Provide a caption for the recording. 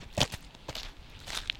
wet gravel road mixdown
Walking on a dirt road wearing Sketcher rubber-soled work shoes with steel toe protection on a rainy day in the suburbs of Detroit, Michigan!
walk, dirt, a, feet, soles, Michigan, foot, ground, step, Rubber, walking, wet